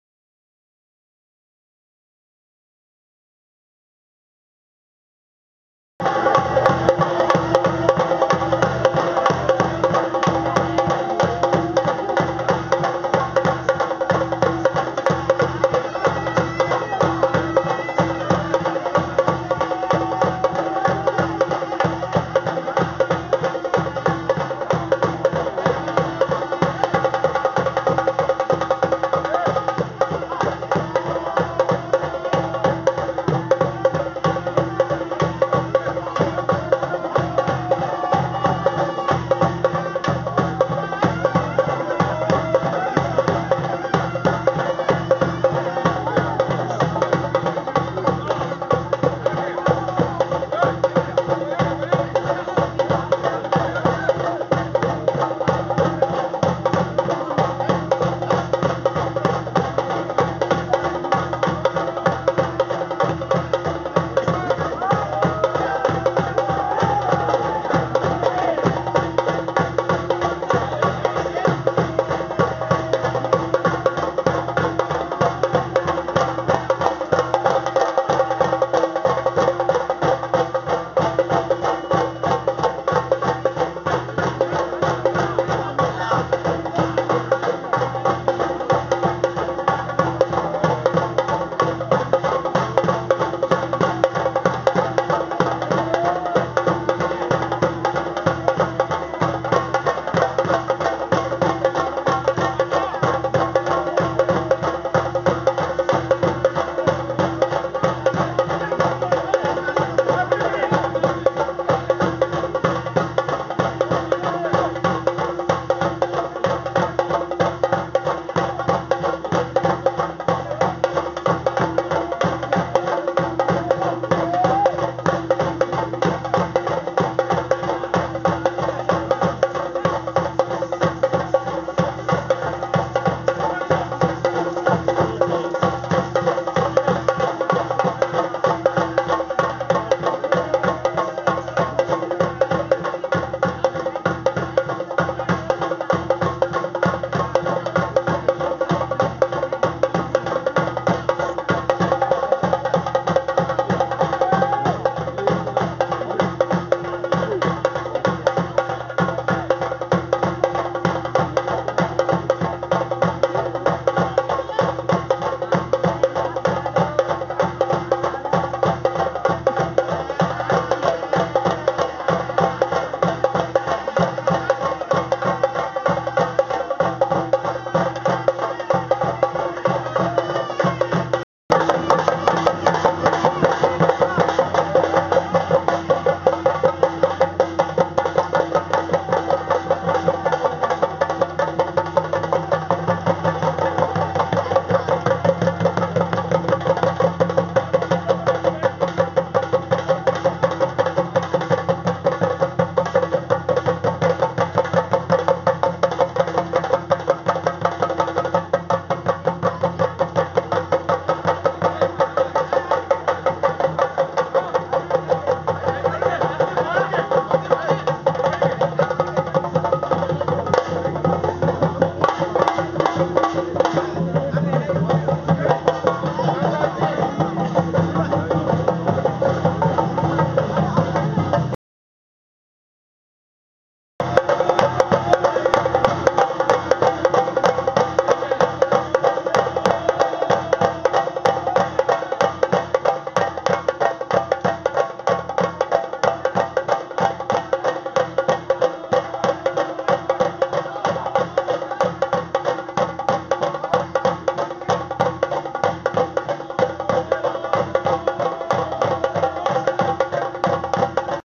Drummers by huge mound of glowing embers as dancer selects a spot and falls headfirst onto the coals